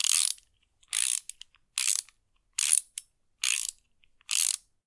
A socket wrench ratcheted at a slow speed. 2 more variations of this sound can be found in the same pack "Tools". Those are at an average and faster speed.